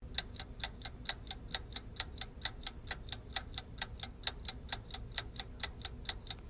the sound of a ticking timer
timer, suspense, ticking